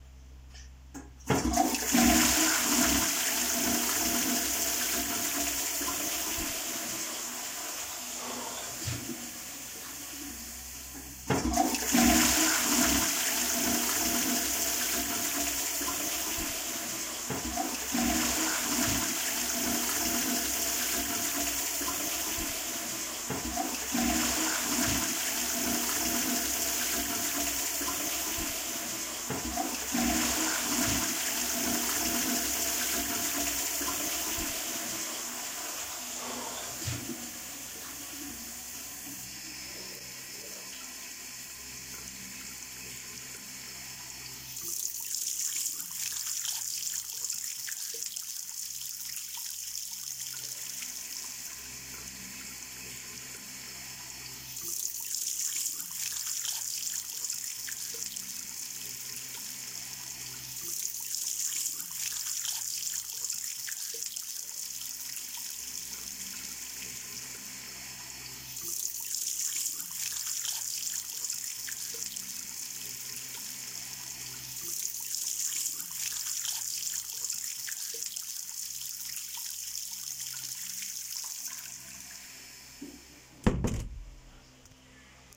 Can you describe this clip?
Toilet in the bathroom sequence ambience 1
This sound , is a more of a sequence ambiance. I went into the bathrooms on campus and I recorded it in one go, flushing a toilet, washing my hands by the basin and then walking out the door and closing it. I added volume to the closing door as It was very soft when I recorded it. The rest was a good quality of recording so I left that Unedited.
Bathroom, flush-Toilet-Washing, hands, your